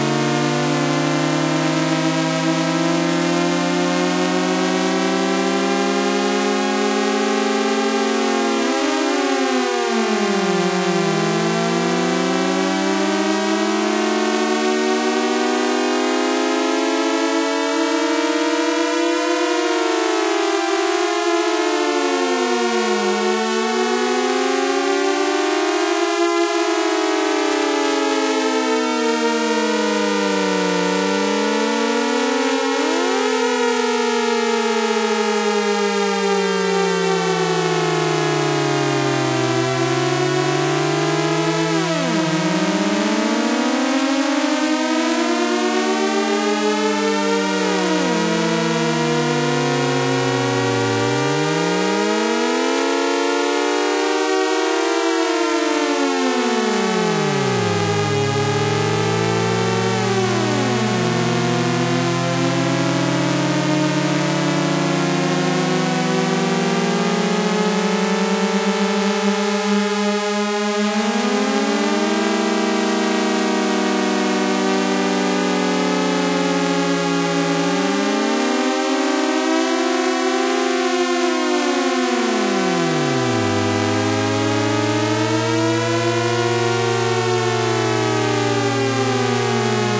testing new generator. this one seems to imitate F1 racing some sort of.